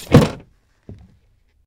metal thump springy
Foley SFX produced by my me and the other members of my foley class for the jungle car chase segment of the fourth Indiana Jones film.
metal, springy, thump